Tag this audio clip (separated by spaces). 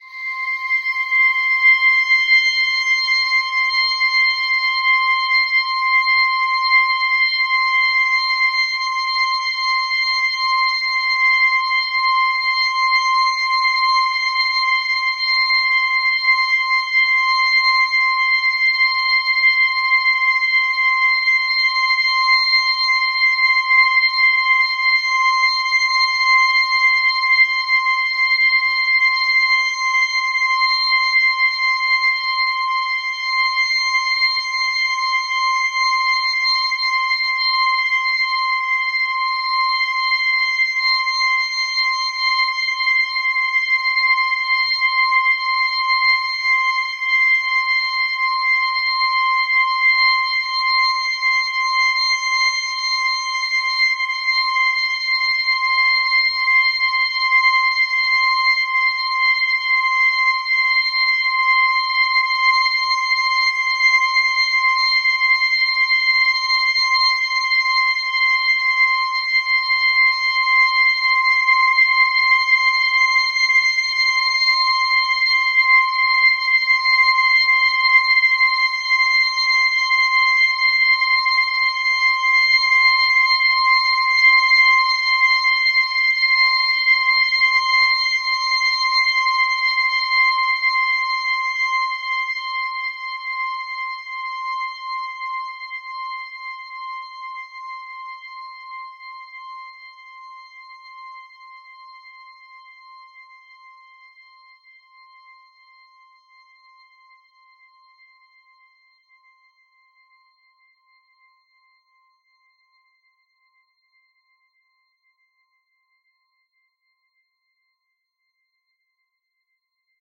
overtones ambient multisample pad